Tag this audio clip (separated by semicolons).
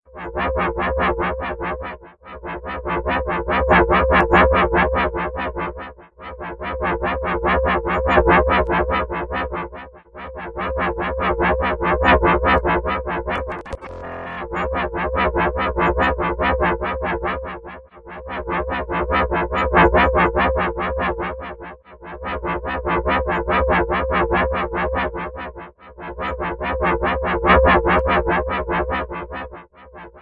Machine; Factory; Weird; Machinery; Strange; Synthetic; Sci-Fi